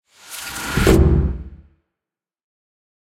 SFX Thrilling Build-Up and Hit 5 (Made at Paradise AIR)
I recorded a lot of sounds in the area, and edited them into a series of thrilling sound effects.
action, appearing, approaching, build-up, buildup, cinema, cinematic, climatic, climax, crescendo, drama, dramatic, fear, film, filmic, futuristic, hollywood, increasing, intense, movie, rising, SFX, suspense, tense, tension, threatening, thrill, thriller, thrilling